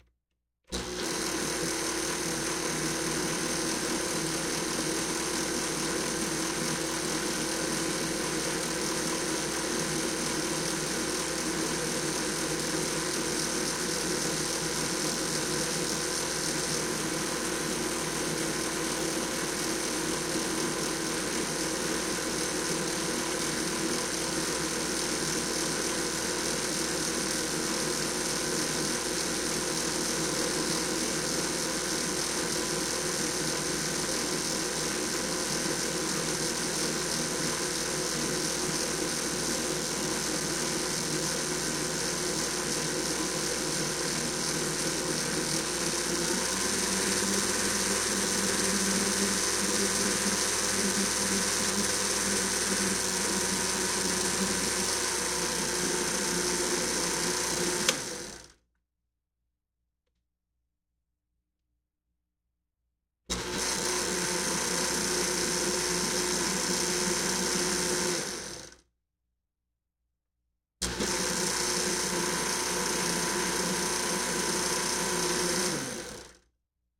80's Oster blender filled with water.